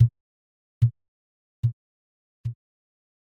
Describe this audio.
sound made with a tweaked digital 808 simulator and processed